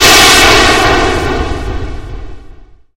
air suddenly decompressing on a spaceship